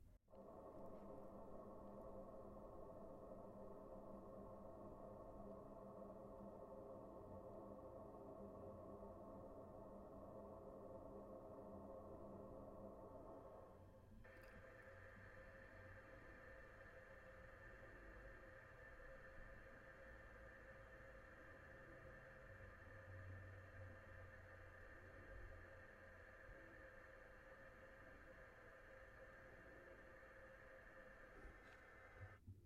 Water running through apartment building pipes and plumbing
I was in my sound booth (a walk in closet) recording voice overs when someone in an adjacent room or apartment used water in a bathroom. Sounds to me like the start of water flow after a toilet flush and then a separate water flow starting in the sink as the person washed their hands.
Recorded on a Neumann TLM 103 microphone through a Zoom H6 interface.
drain, water-pipe, sewage, field-recording, apartment-building, plumbing, pipes, flow, water, pipe, water-flow, urban